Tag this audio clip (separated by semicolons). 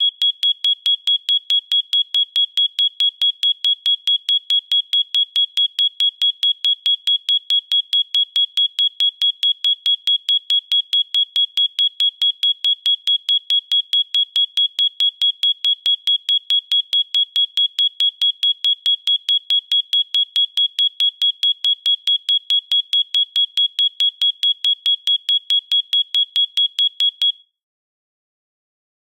112; 911; alarm; alert; apartment; beep; beeps; burning; emergency; fire; foley; home; rescue; warner